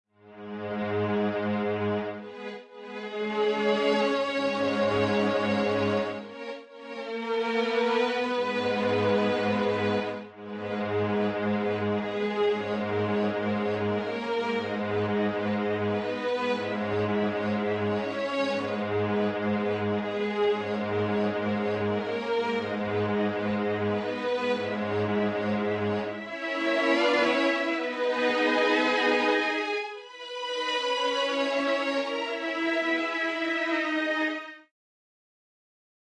wrote a short piece in fl studio orchestra patch in la minor scale